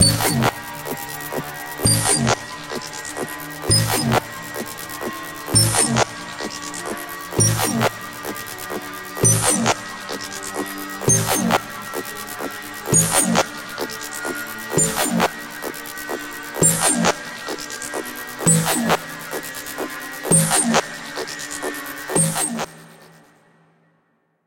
muvibeat4 130BPM
made with vst instruments